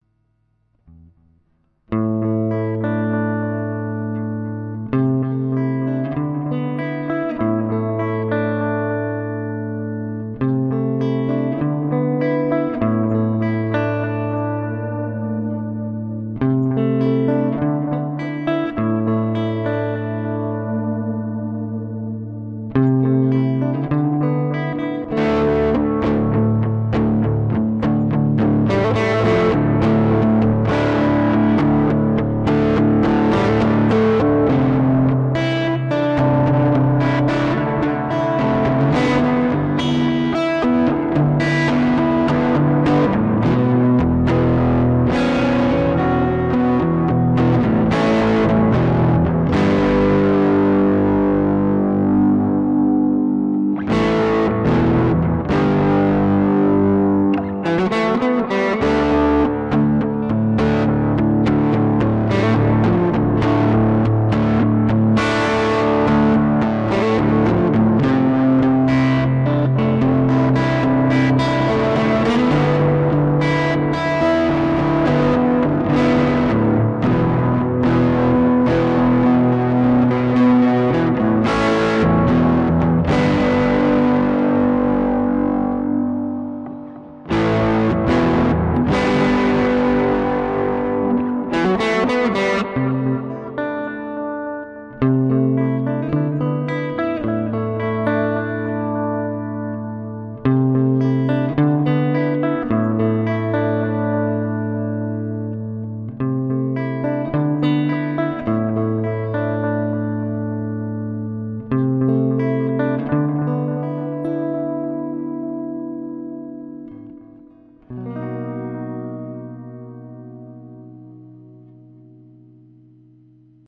Grunge Echo Guitar
This is experimental electric-guitar song, with using guitar pedals: delay (Electro-Harmonix memory toy), reverb (Electro-Harmonix holy grail plus), overdrive (Boss OD-3). Made record through "presonus inspire 1394".
Echo, Grunge, Reverbation, ambient, chords, clean, delay, distorted, distorted-guitar, distortion, effect, electric, electric-guitar, electro, electronic, experimental, guitar, guitar-chords, melodic, melodical, music, noise, overdrive, psychedelic, reverb, rhythm, rhythm-guitar, solo, sound